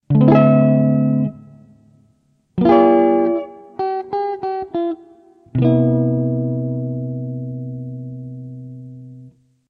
A little ii Vb9 I in C on my new Line 6 pocket POD